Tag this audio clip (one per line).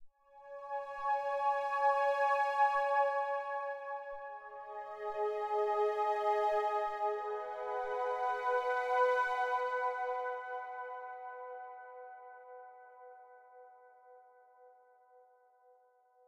soft
ambient
liquid
jungle
synth
pad
sombre